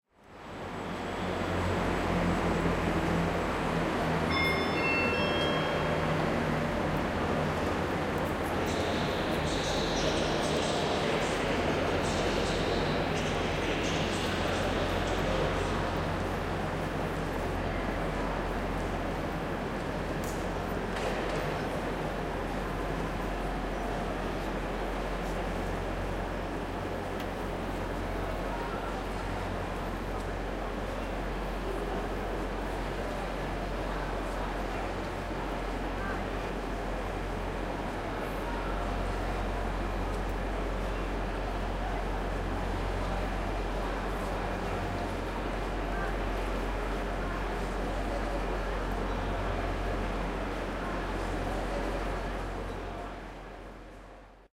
London Paddington Station concourse mid-morning
This was recorded at London Paddington station on 6th September 2012 at around 11 a.m. I needed general station ambience sound to use in a play about an over-night railway journey from West Wales to Paddington. This is an excerpt of one of the pieces I recorded and used.